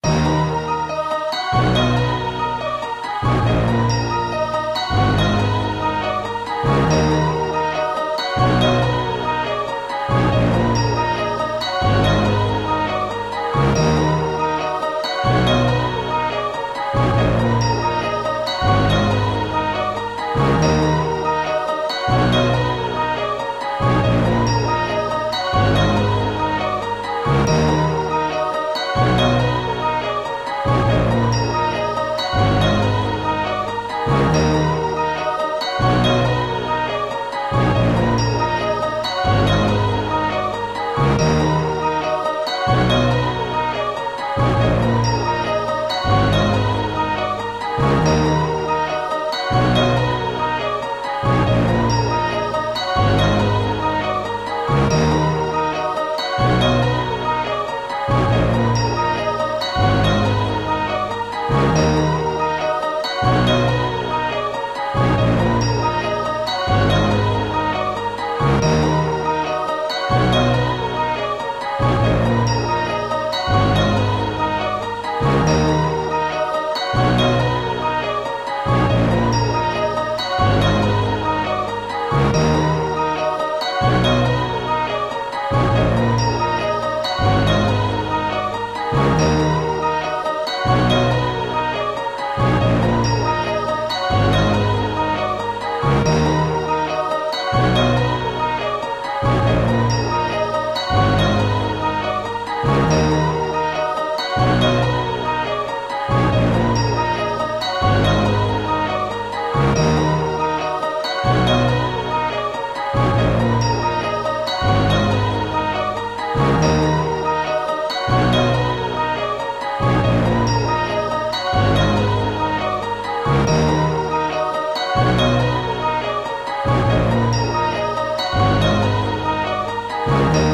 A choir sound with alt rock elements and orchestral elements in my bands new ep titled "virus"
Alt, Choir, Rock